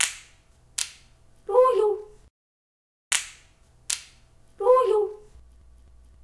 kidloop77bpm
intense, platinum, 77bpm, loop, hit, noisemaker, famous